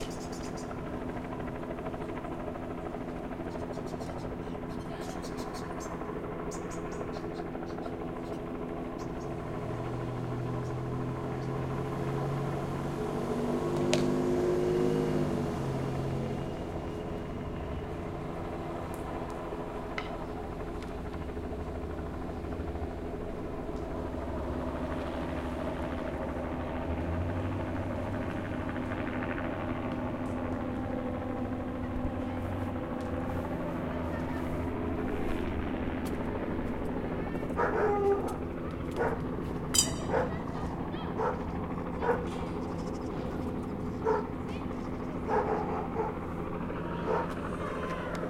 choper over neighborhood
This is a recording of a helicopter hovering over a quiet neighborhood in Hayward, CA. One can hear an occasional car passing, a dog bark, kids playing. Recorded on Zoom H4n.
cars-passing, helicopter, hover, over-neighborhood